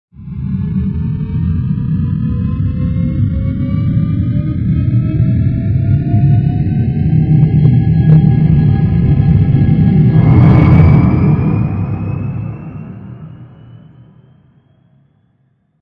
An alien spaceship taking flight.